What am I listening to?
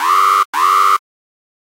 2 alarm long b
2 long alarm blasts. Model 2
alarm, gui, futuristic